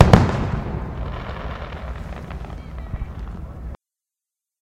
double hit with dust fall
recording of a double firework explosion with some after dust falling down
ambience, distant, double, dust, explosion, fall, falling, fire, fireworks, hit, loud, outside